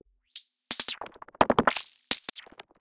it sounds underwater....
analouge, dolphin, drumloop, filtered, glitch, korg, monotron, underwater